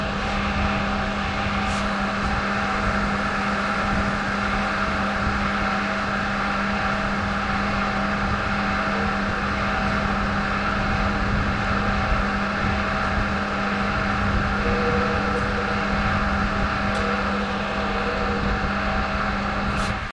virginia tunnelvent
A vent on the exit of the tunnel on the Chesapeake Bay recorded with DS-40 and edited in Wavosaur.